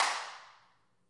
Snaps and claps recorded with a handheld recorder at the top of the stairs in a lively sounding house.